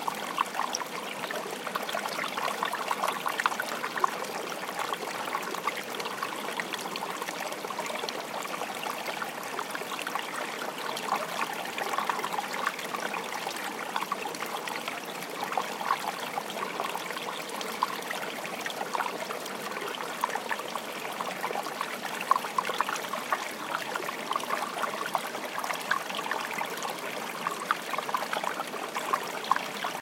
Rio Homem, Peneda-Gerês National Park, Portugal - near Porta de Homem; In august a brook with cascades.
Recorded with an iPhone5S